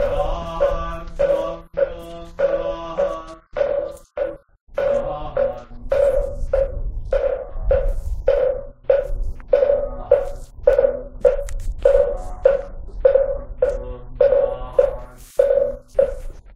Monk Chant2
Buddhist monk chanting at Bulguksa Temple, Gyeong-Ju, South Korea.
temple, korea, field-recording, chant, loop, buddhist